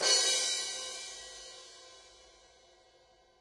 CrashVAR2MS1
splash, stereo, mid-sidepercussion, DD2012